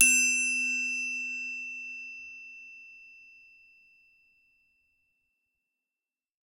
Triangle Open 04
Basic triangle sample using wooden mallet.
Recorded using a Rode NT5 and a Zoom H5.
Edited in ocenaudio.
It's always nice to hear what projects you use these sounds for.
acoustic, app, clang, cue, ding, hit, idea, Idiophone, indication, instrument, interface, metal, metallic, notification, percussion, ping, ting, Triangle, ui, user